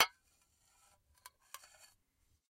Small glass plates being scraped against each other. Plates tap and then scrape with a smoother sound. Close miked with Rode NT-5s in X-Y configuration. Trimmed, DC removed, and normalized to -6 dB.